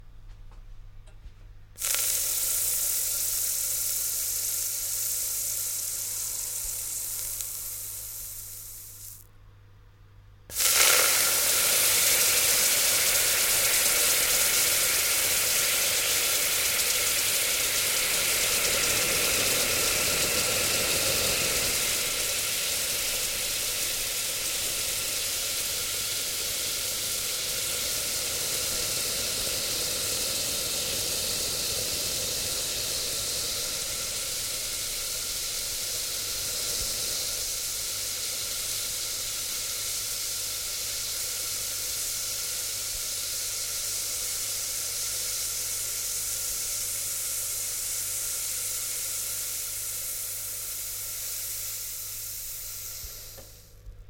Water evaporating once dropped onto a hot pan - take 6.